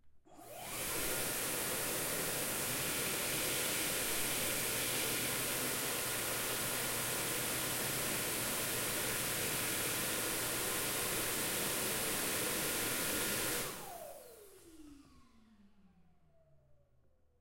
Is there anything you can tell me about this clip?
Dyson Hand Dryer
Dyson Commercial Bathroom Hand Dryer.
Bathroom, Blower, Dryer